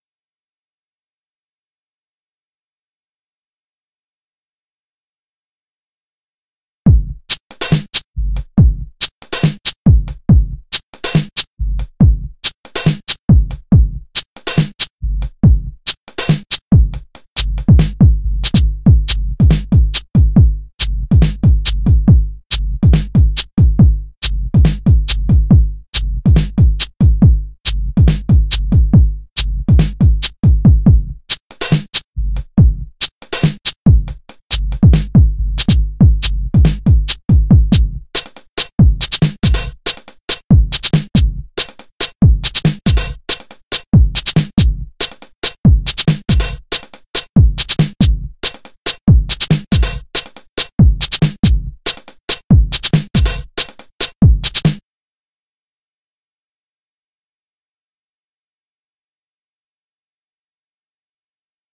Alger full drums track